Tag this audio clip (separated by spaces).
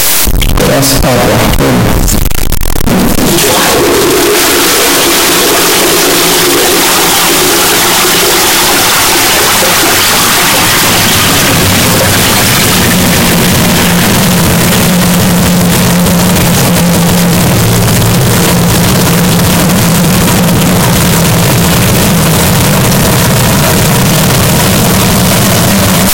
falling Flushing-toilet water